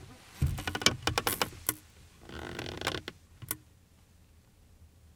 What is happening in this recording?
By request.
Foley sounds of person sitting in a wooden and canvas folding chair. 7 of 8. You may catch some clothing noises if you boost the levels.
AKG condenser microphone M-Audio Delta AP